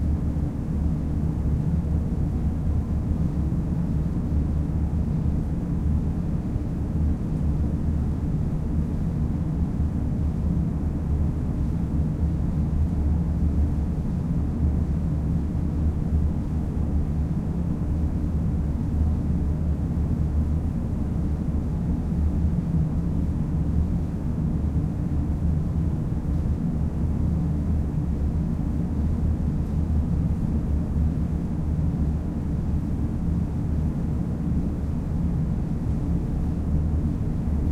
amb int air installation ventilation system drone loud
Recorded with Zoom H4n in Mainz Germany at Peter Cornelius Konservatorium.